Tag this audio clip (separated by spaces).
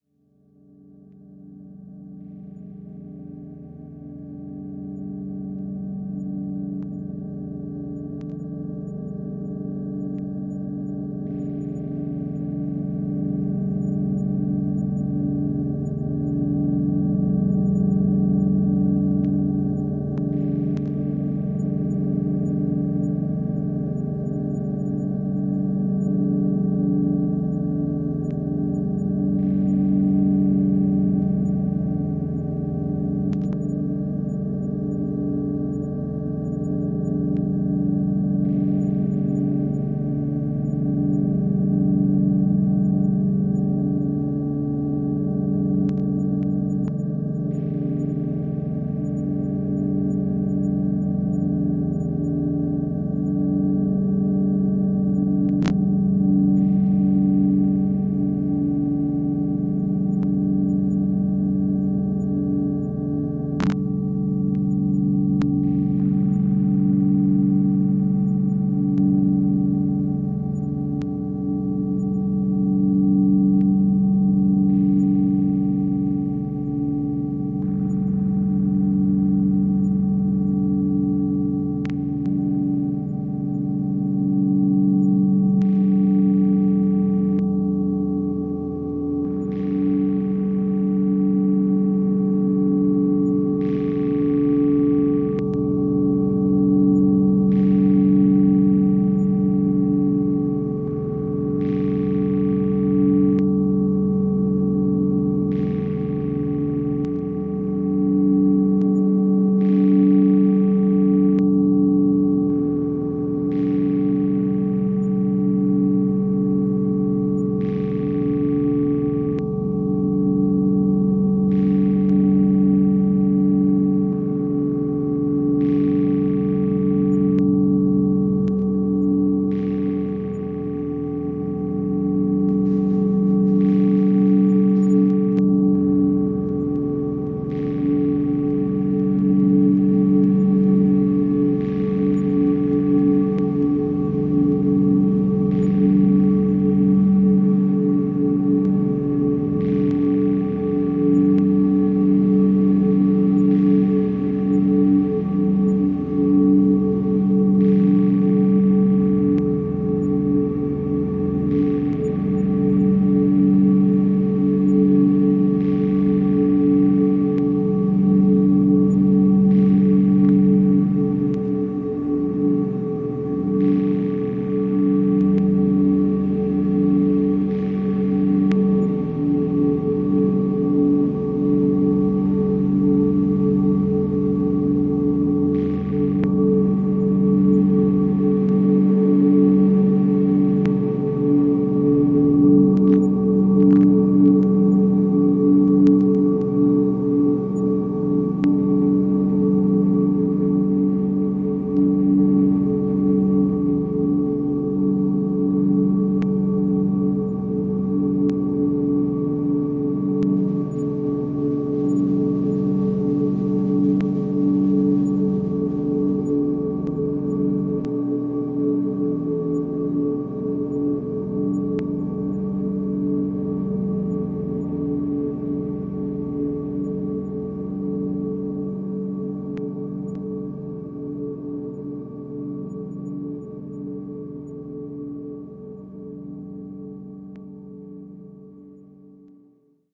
atmosphere ambient microcomposition drone glitch feedback soundscape dreamy